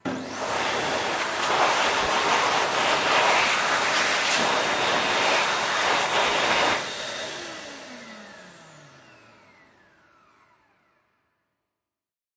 Hand Dryer 6 (hand movement)
Recording of a Hand-dryer. Recorded with a Zoom H5. Part of a pack
Bathroom, Dryer, Hand, Vacuum